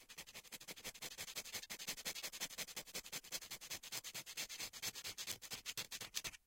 Queneau frot rapid 01
prise de son de regle qui frotte
clang, cycle, frottement, metal, metallic, piezo, rattle, steel